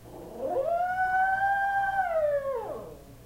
Here shaggy demonstrates the basics of canine tuvan multitonal throat singing.